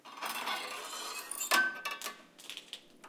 Old sprigy door knob
cracle, door, knob, push, scratch, spring